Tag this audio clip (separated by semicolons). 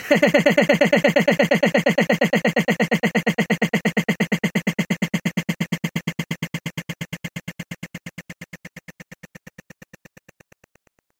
creepy; giggle; glitch; horror; laugh